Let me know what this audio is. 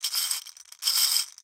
Glass marbles shaken in a small Pyrex bowl. Bright, glassy, grainy sound. Close miked with Rode NT-5s in X-Y configuration. Trimmed, DC removed, and normalized to -6 dB.
shake, bowl